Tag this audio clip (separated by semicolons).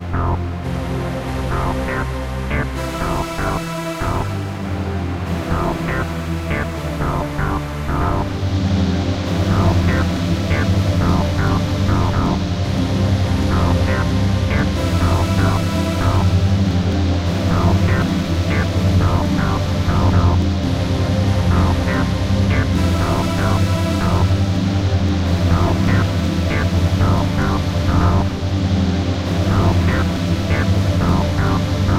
electro; music; atmosphere; ambience